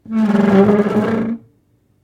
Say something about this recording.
Chair-Stool-Wooden-Dragged-14
The sound of a wooden stool being dragged on a kitchen floor. It may make a good base or sweetener for a monster roar as it has almost a Chewbacca-like sound.
Ceramic, Drag, Dragged, Kitchen, Monster, Pull, Pulled, Push, Pushed, Roar, Snarl, Stool, Tile, Wood, Wooden